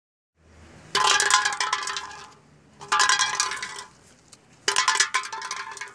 Sound of a kicked can
A can is kicked by legs in the street.